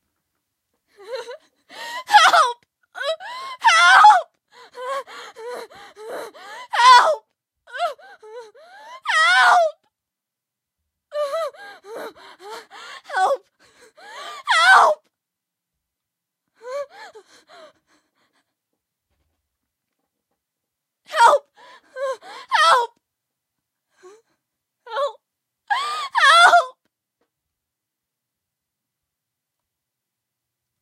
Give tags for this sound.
game; emotional; hurt; help; acting; scary; scream; whisper; voice; upset; female; crying; horror; tears; worried; scared; sad; cry; shout; screaming

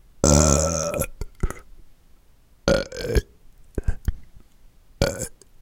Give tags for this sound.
belch; burp; disgusting; gross

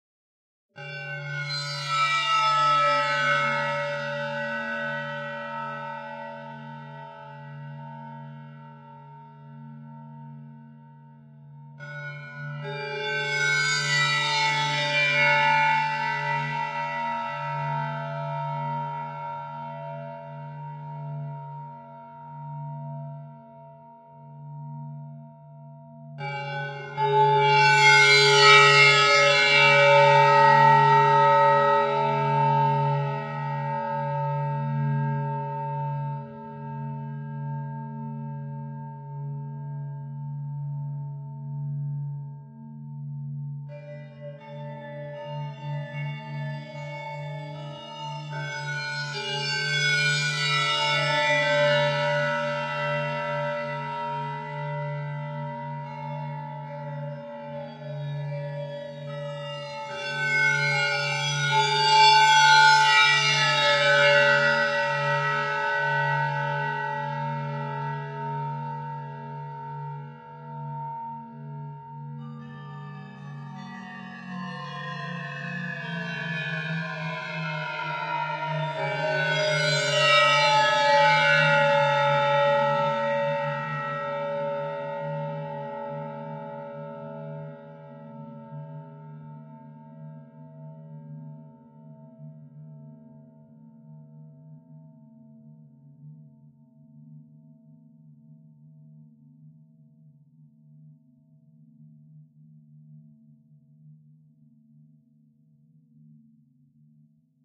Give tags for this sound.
glissando; mallet; percussion; physical-modeling; synth

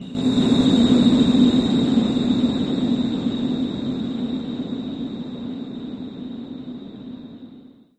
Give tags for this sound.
ambient; pad; reaktor; atmosphere; multisample

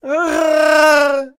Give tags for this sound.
scream; shout

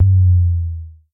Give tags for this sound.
analog; kick; modeling; percussion; raw; synthesizer

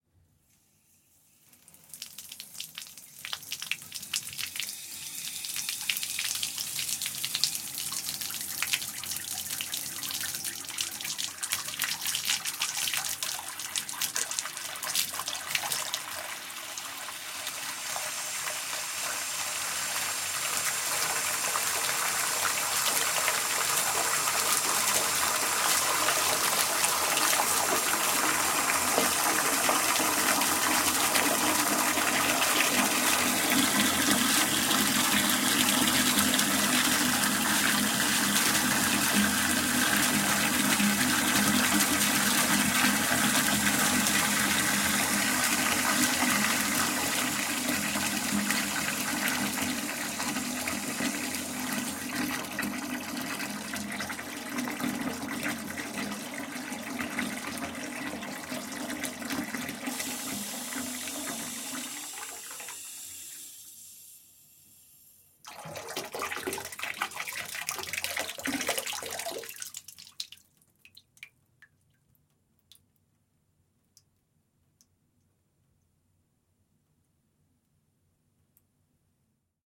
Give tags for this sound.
bath; pouring; tap; water

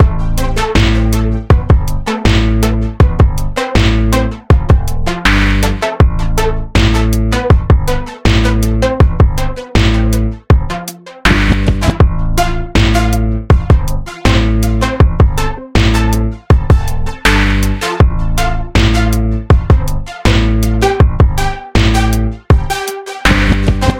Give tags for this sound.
A; minor; 80bpm

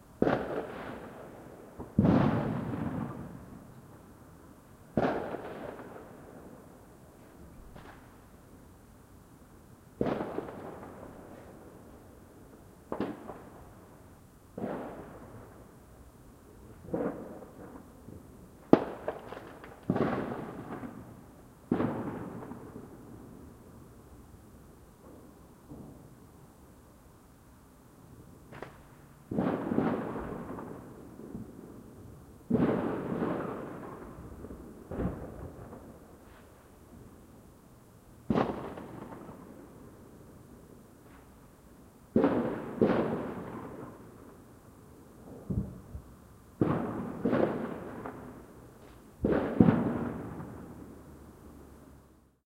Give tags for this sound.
explosives; blasts; explosions; pyrotechnics; fireworks; background; bombs; war

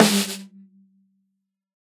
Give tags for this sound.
1-shot; drum; multisample; snare; velocity

